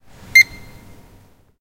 barcode-reader
This is the typical sound you can hear in the upf poblenou library (floor 0). When someone books a document, the employee of the library reads the bar code with the appropiate gadget, which makes this "piiip" sound. The recording was made with an Edirol R-09 HR portable recorder and the microphone was placed near to the source.
barcode, barcode-reader, barcode-scaner, crai, library, pip, upf, UPF-CS14